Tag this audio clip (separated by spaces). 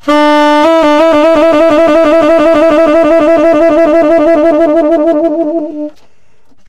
tenor-sax saxophone sampled-instruments vst